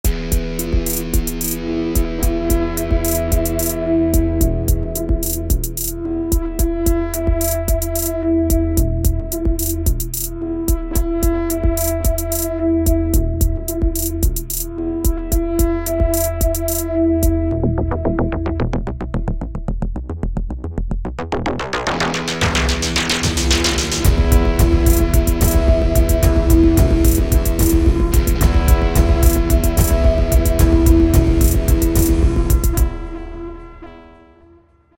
Atmospheric eerie song
This song is perfect for suspense and creepy projects
music, Scary, song